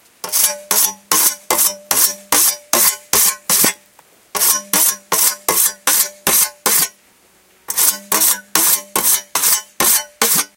scythe sharpening, the hiss in the background is rain old-fashion